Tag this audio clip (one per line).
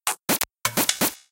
fun
funk
live